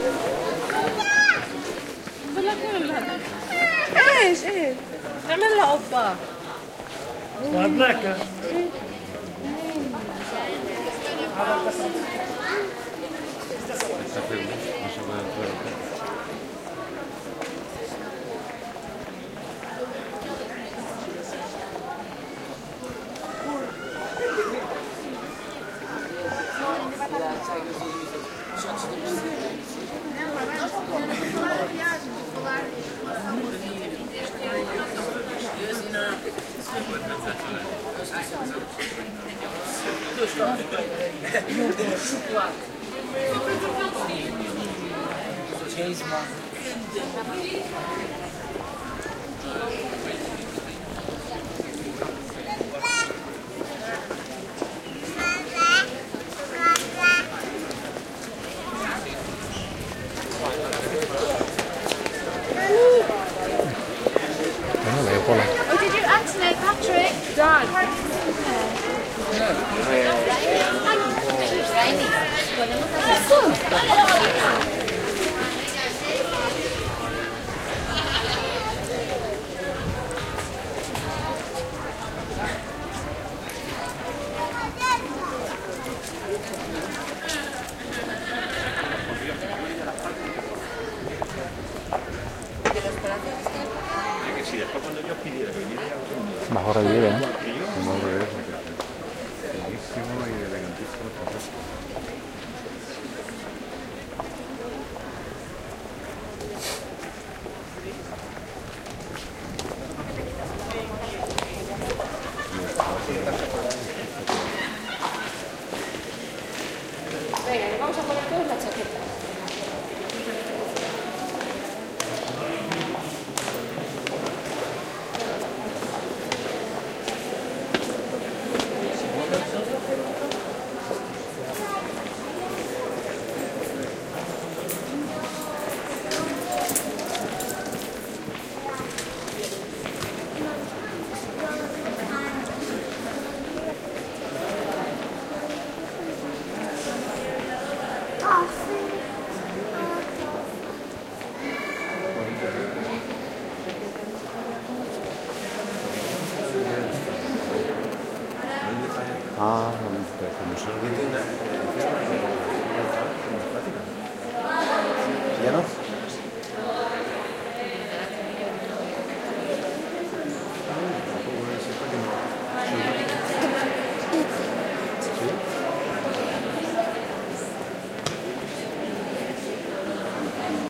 20101023.palacio.carlos.V
voices talking in many languages near Palacio de Carlos Quinto, near the Alhambra of Granada, Spain. Shure WL183 pair into Olympus LS10 recorder